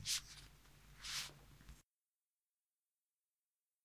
Short swoosh sound ripped from speech. Recorded with phone.
swoosch, Short, whoosh